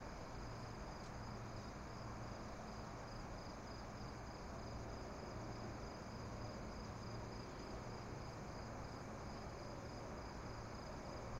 Crickets 160170 OWI
The sound of crickets in the city
City, Night, Cricket